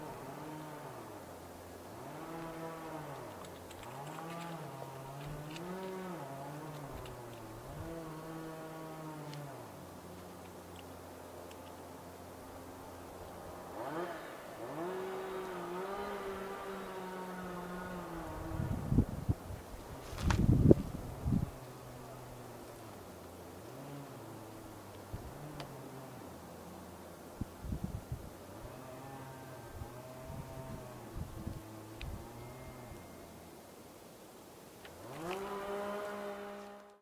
Distant Chainsaw 2
Includes some background noise of wind. Recorded with a black Sony IC digital voice recorder.
branch, branches, chainsaw, cutting, distant, limbs, saw, sawing, tree, trees, wind, wood